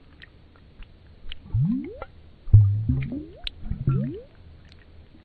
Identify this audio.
stereo wave recording of different bubbles, made a few years ago for a theatre music
kitchen, water, bubble